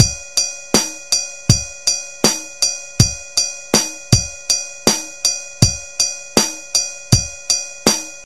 A drum pattern in 11/8 time. Decided to make an entire pack up.
pattern, 8, 08, full, 11-8, drum, 11, kit, 11-08